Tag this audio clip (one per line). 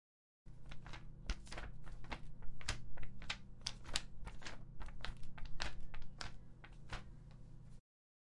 sound
paper